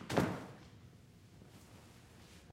object falls (4)
Heavy bundle (linen) dropped near microphone on concrete floor. With imagination, it could sound like a body falling to the ground.
Recorded with AKG condenser microphone M-Audio Delta AP
crash, linen, thud